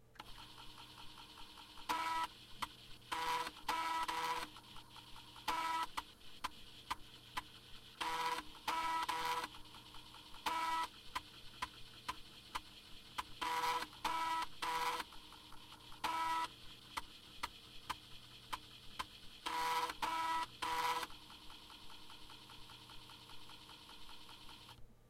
Floppy disk drive - write
A floppy disk drive writing data to a floppy disk. Recorded with a Zoom H1.
computer, disk, diskette, drive, fdd, floppy, hard-drive, write, writing